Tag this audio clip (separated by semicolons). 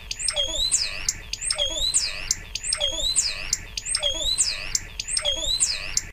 donana; alarm; ringtone; cell-phone; processed; birds; field-recording